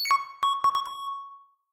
Just some more synthesised bleeps and beeps by me.